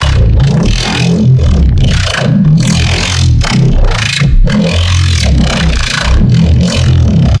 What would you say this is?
Filthy Reese Resample
This is a bass made in sytrus that was notched and bandpassed. After that I recorded and resampled in harmor.
harmor, neuro, bandpass, reese, bass, resampled, notch, sytrus, fl-studio